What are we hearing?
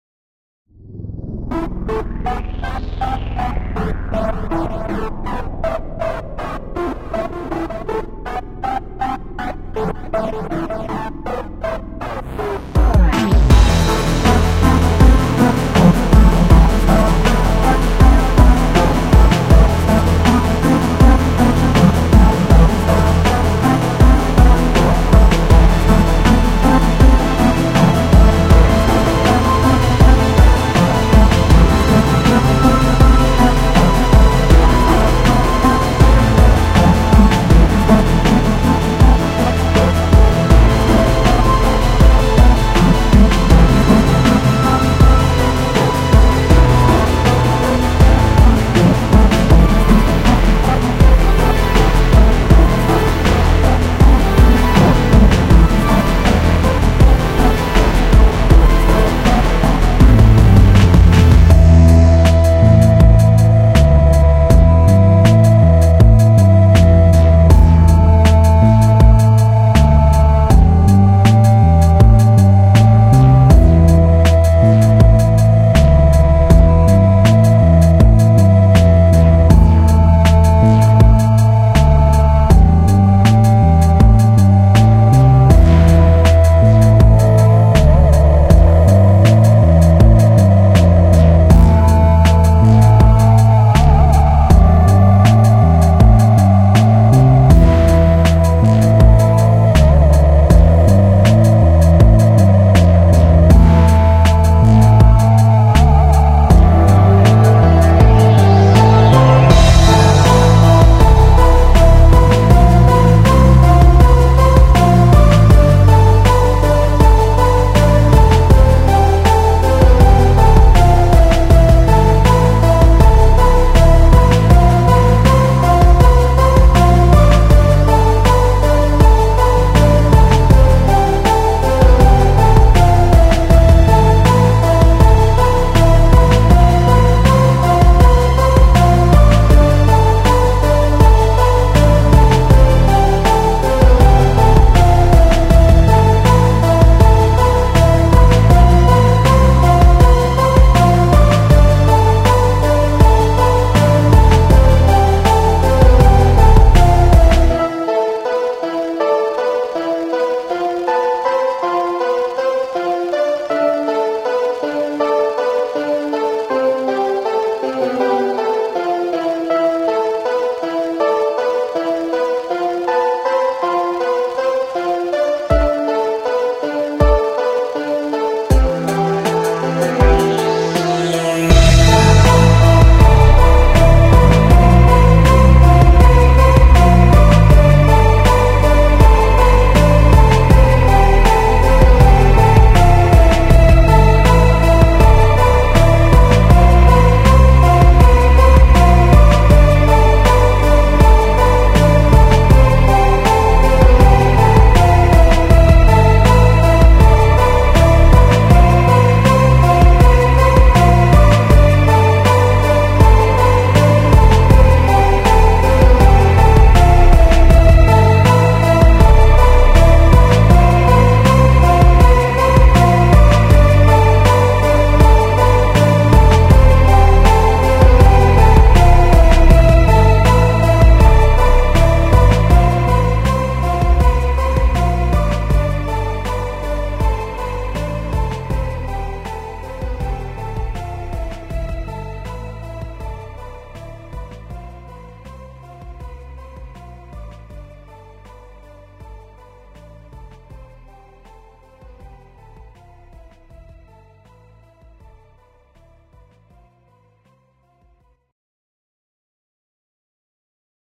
Hello and welcome!
Before creating my game, I've created music.
Unfortunately, it turned out that the created music does not match the atmosphere of the game I'm working on in any way.
If you think that the soundtracks might be useful to you, please use it!
I am 1 dev working on the game called Neither Day nor Night.
Check it out!
(And preferably a link to the Steam or Twitter if possible!)
Enjoy, and have a good day.
#NeitherDaynorNight #ndnn #gamedev #indiedev #indiegame #GameMakerStudio2 #adventure #platformer #action #puzzle #games #gaming